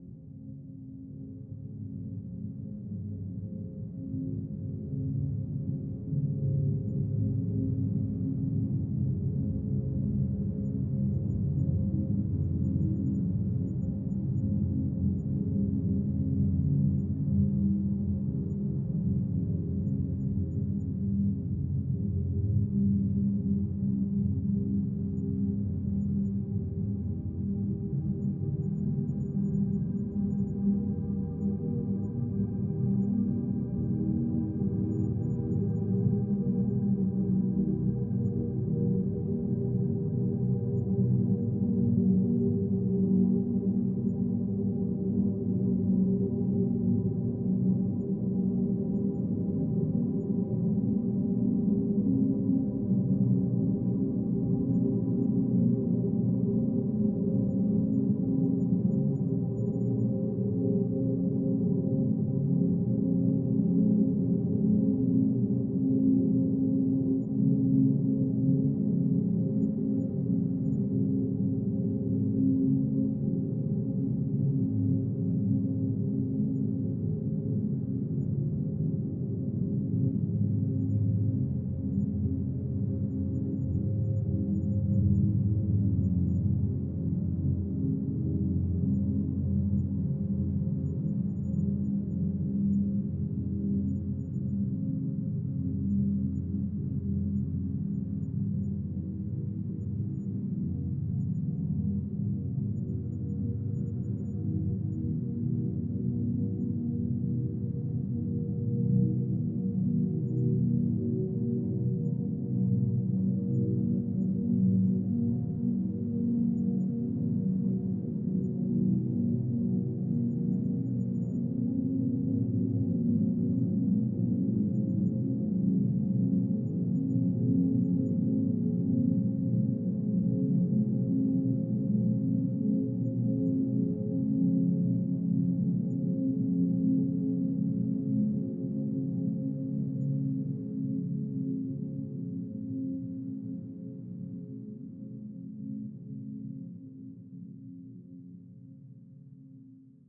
Future Garage (Ambient Textures) 02
Future Garage (Ambient Textures)
Opening/Ending
ambiance, Ambient, Dreamscape, Future, Garage, Textures, Wave